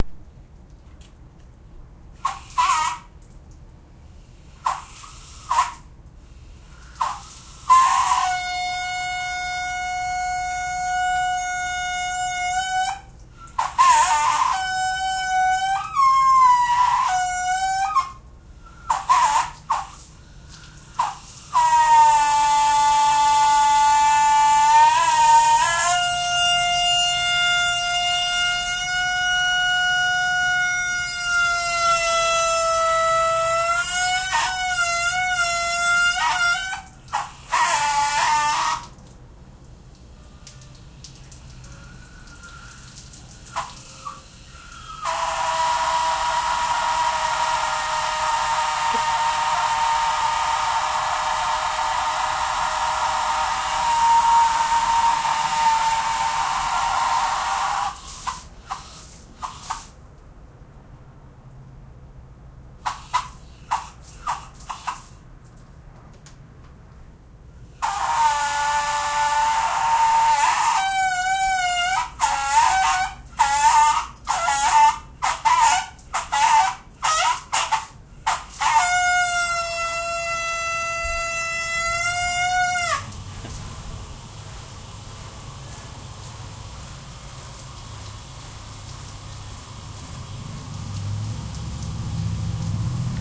Horribly offensive sound of the plastic sprayer on our hose.
awful, noise, water, faucet, squeak, hose